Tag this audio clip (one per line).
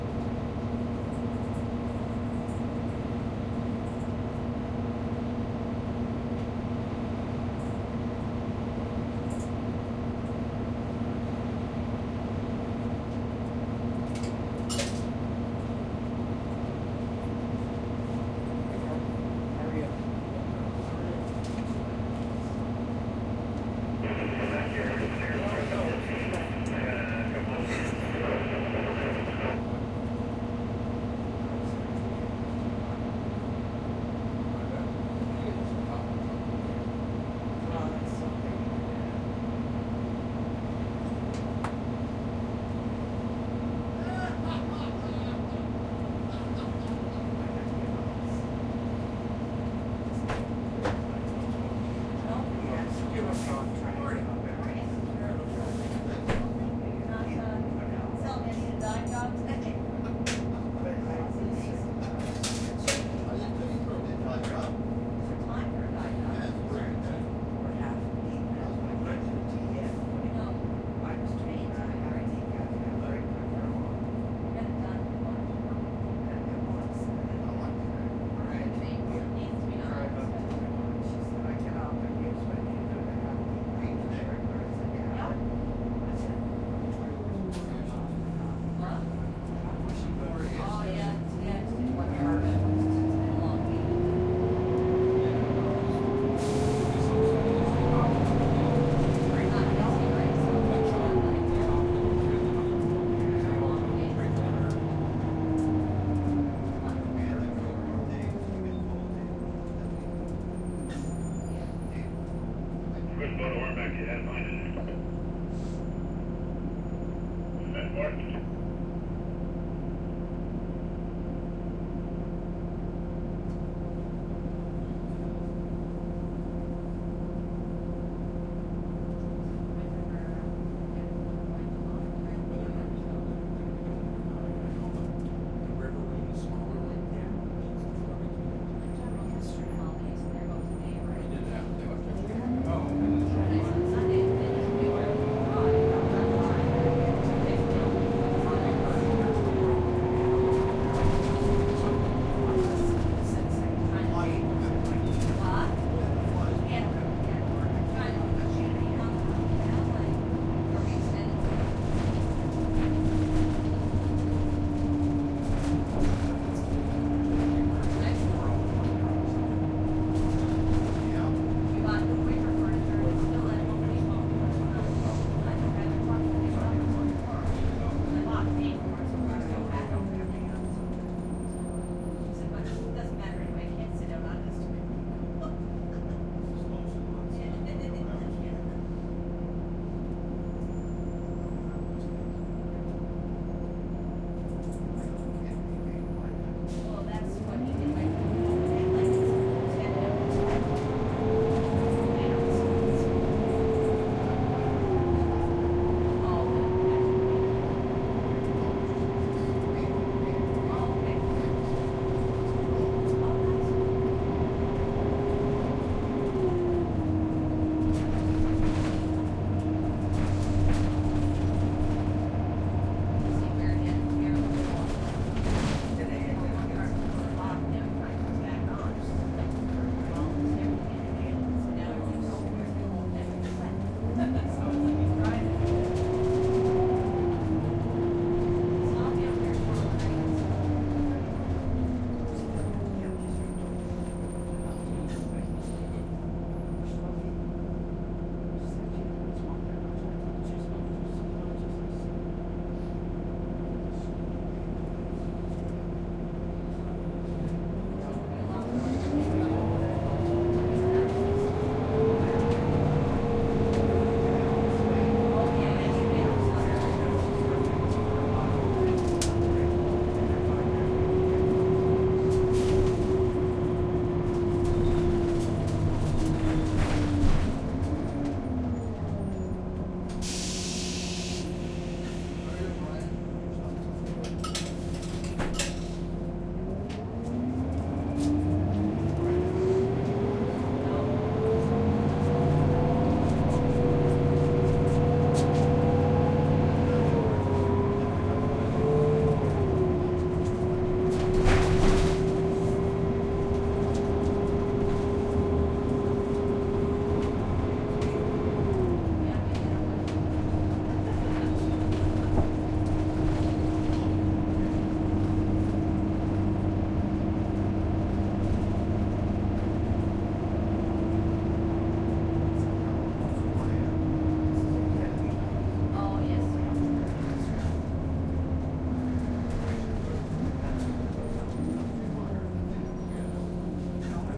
bus
field-recording
transportation